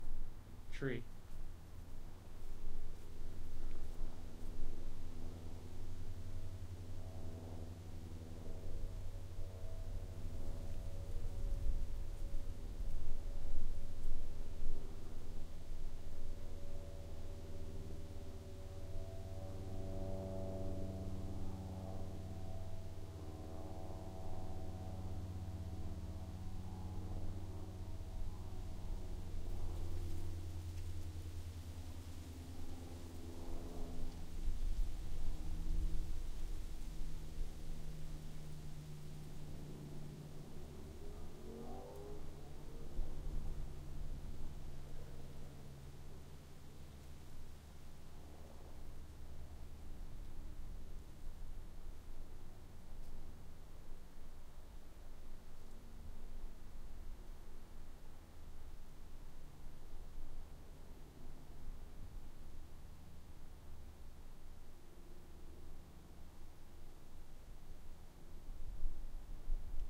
Tree Stereo
Two different Monotracks added together as left and right channels in post of a tree in nature.